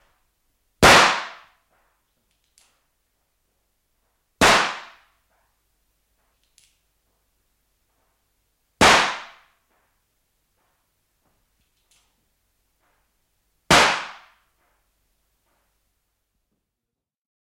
Pistooli, laukauksia sisällä / Pistol, shot, a few gunshots, interior
Pistooli, muutama laukaus huoneessa.
Paikka/Place: Suomi / Finland / Vihti
Aika/Date: 1975
Laukaukset, Shooting, Ammunta, Ampuminen, Aseet, Finnish-Broadcasting-Company, Pistol, Pistooli, Yleisradio, Field-Recording, Suomi, Tehosteet, Shot, Weapon, Weapons, Ase, Finland, Gun, Soundfx, Gunshot, Pyssy, Laukaus, Yle